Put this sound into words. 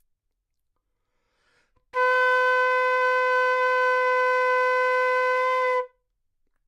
Part of the Good-sounds dataset of monophonic instrumental sounds.
instrument::flute
note::B
octave::4
midi note::59
good-sounds-id::3033